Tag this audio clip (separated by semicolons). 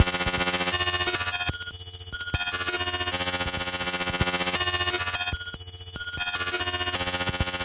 noise; feedback; machinery; weird; machine; glitch; mechanical; computer; robotic; robot